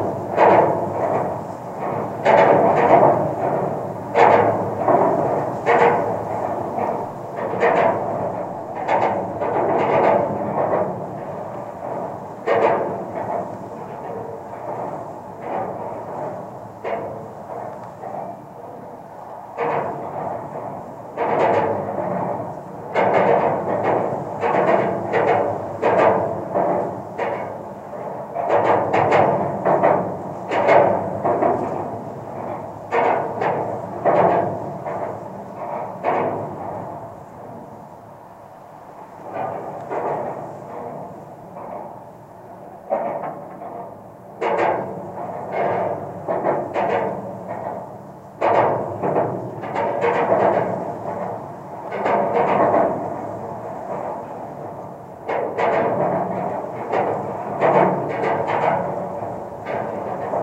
GGB A0224 tower NEW T03
Contact mic recording of the Golden Gate Bridge in San Francisco, CA, USA from the west surface of the east leg of the north tower (Take 03). Recorded October 18, 2009 using a Sony PCM-D50 recorder with Schertler DYN-E-SET wired mic.
steel-plate, cable, microphone, contact-mic, contact-microphone, Schertler, metal, bridge, wikiGong, contact, field-recording, Golden-Gate-Bridge, steel, DYN-E-SET, Sony-PCM-D50